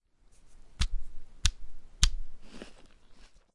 Apple Stem Flick
Flicking the stem of an apple twice with a high snappy timbre. Recorded in a hifi sound studio at Stanford U with a Sony PCM D-50 very close to the source, a yellow/green golden delicious.
delicious, hifi, aip09, flick, apple, stem, golden, finger